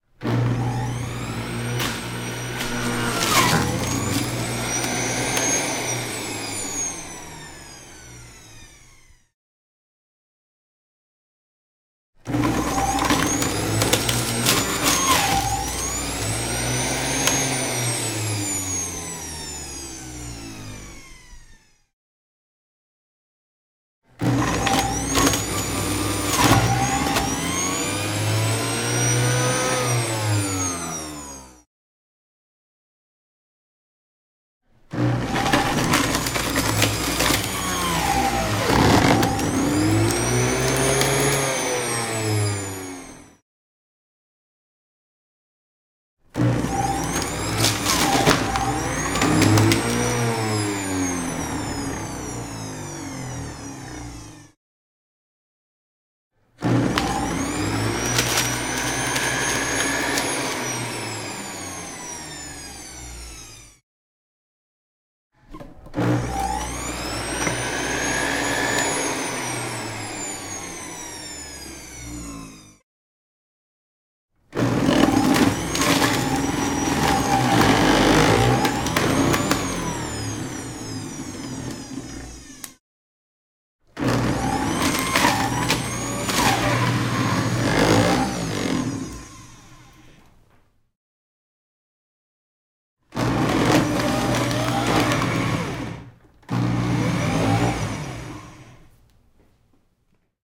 food processor blender crunch veggies for smoothie various